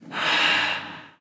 Breathing, echoes. Primo EM172 capsules inside widscreens, FEL Microphone Amplifier BMA2, PCM-M10 recorder. Recorded inside an old cistern of the Regina Castle (Badajoz Province, S Spain)